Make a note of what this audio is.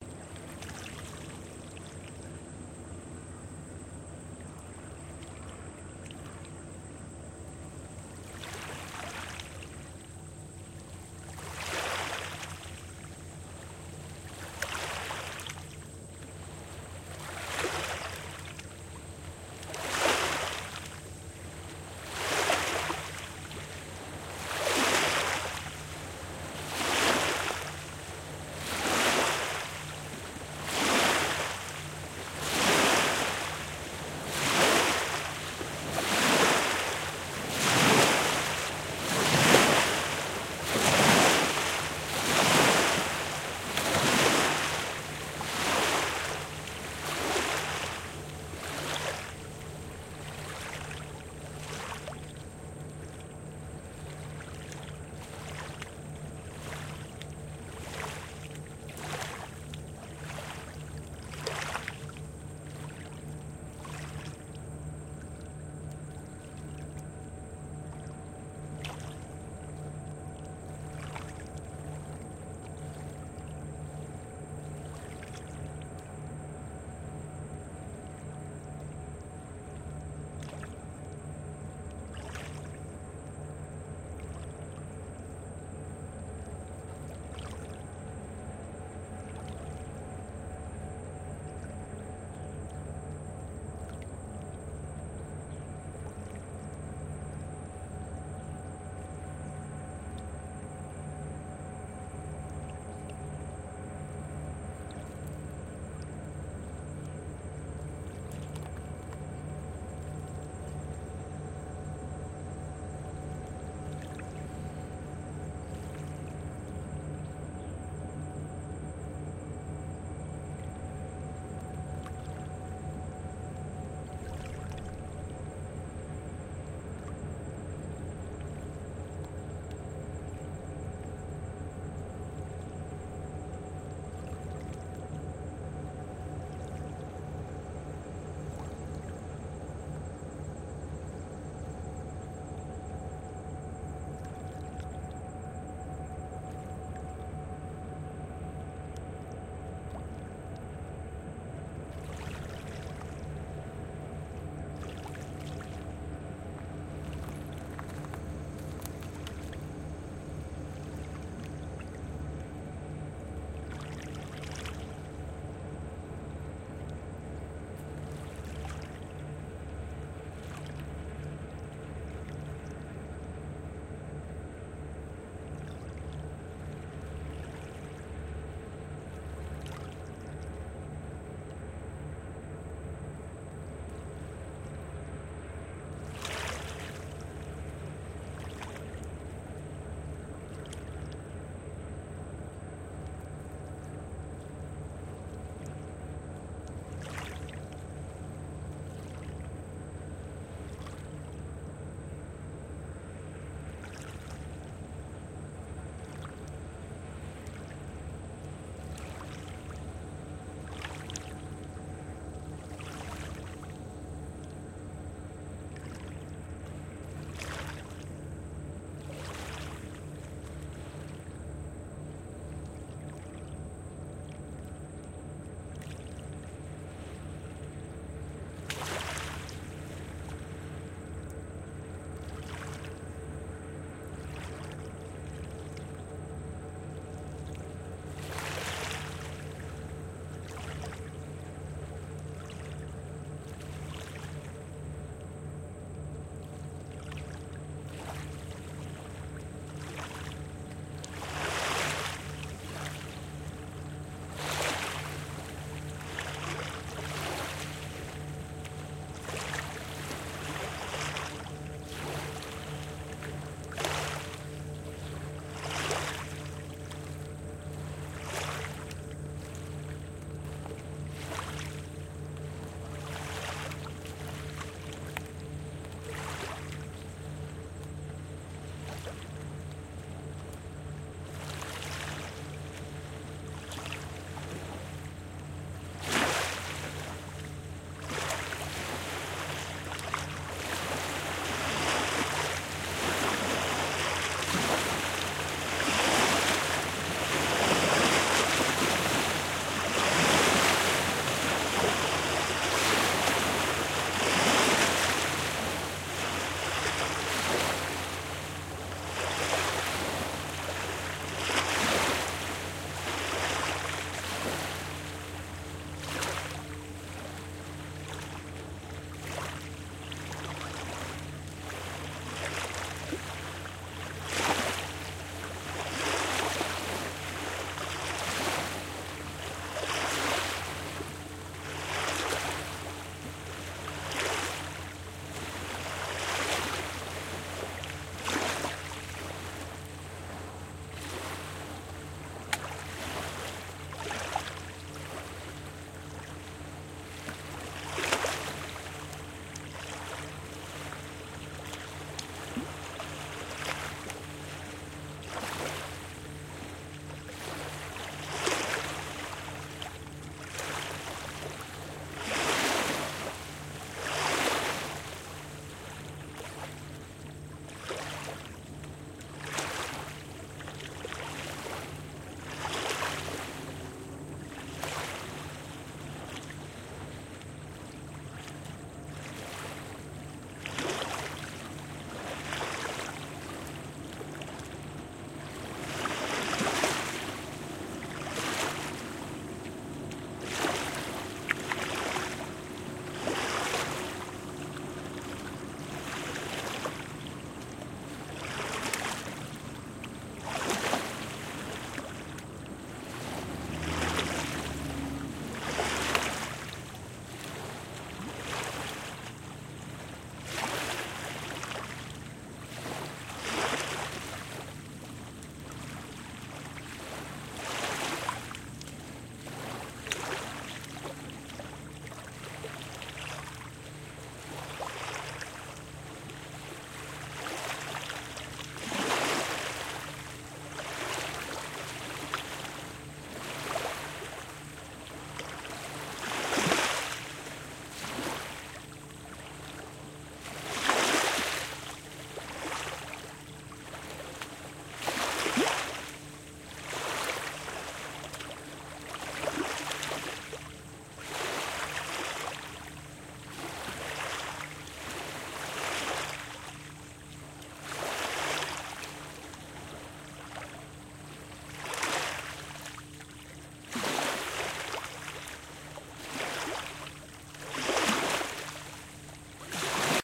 OhioRivermp3WavesGolcondaJuly28th2018
A typical summer soundscape on the bank of the Ohio River in southern Illinois. The low, drone of a huge barge can been heard. Also, the higher-frequency insects are also heard from time to time.
Sound recorded on Saturday July 28th, 2018 at 2:00PM using the Sound Devices 702 and the Audio Technica BP4025 stereo microphone.
River, Summer, Splash, Water